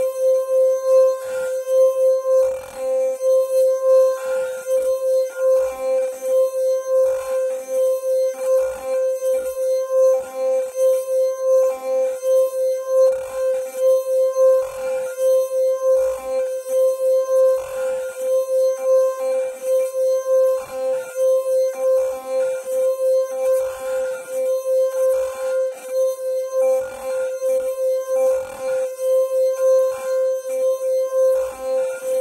Wine Glass Sustained Hard Note C5
Wine glass, tuned with water, rubbed with pressure in a circular motion to produce sustained distorted tone. Recorded with Olympus LS-10 (no zoom) in a small reverberating bathroom, edited in Audacity to make a seamless loop. The whole pack intended to be used as a virtual instrument.
Note C5 (Root note, 440Hz).
clean, drone, glass, hard, instrument, loop, melodic, noisy, note, pressed, pressure, sustained, texture, tone, tuned, water, wine-glass